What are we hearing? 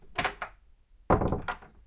Opening, closing door
Opening my door, then closing it. Close HQ recording